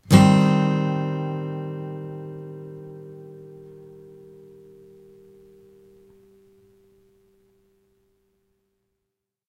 yamah Cm torture position
Yamaha acoustic guitar strummed with metal pick into B1.
acoustic, amaha, c, chord, guitar, minor